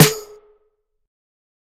TamboMidas Tambourine Snare Drum - Nova Sound
Drum, EDM, Sound, FX, TamboMidas, Loop, Clap, Tambourine, Drums, TamboRock, House, Percussion, Snare, Nova, Dance